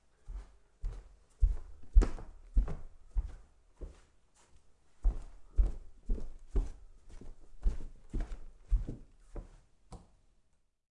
foot steps across wooden floorboards